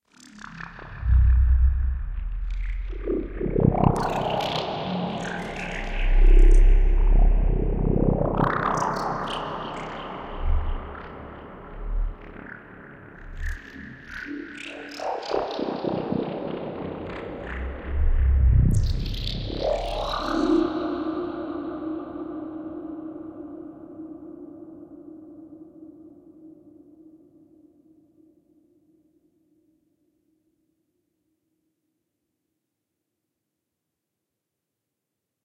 Bubbly Planet Soundscape
Didn't know what to name this, but it sounds like some sort of bubbly cave!
ambiance, ambience, ambient, atmo, atmos, atmosphere, atmospheric, background, background-sound, bubble, bubbles, FM, general-noise, sound-scape, soundscape